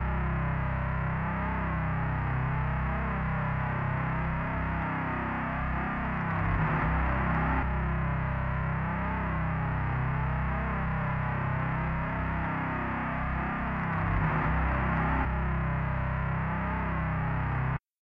Guitar loop reverse 1
This one is made with a guitar on the POD. Using looper in reverse with very little distortion. Included delay, flanger. decreased to half speed of the original recording to add depth and bottom
guitar, low, deep, drive, hard, dark, bass